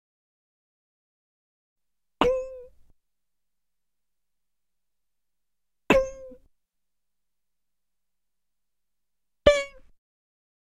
8 Cartoon, Bing Vocal, Hammer On Anvil :01 4001 8-2 Cartoon, Bing Vocal, Hammer O
Human saying Bing, Ping and Ding.
human, vocal, pop, request, voice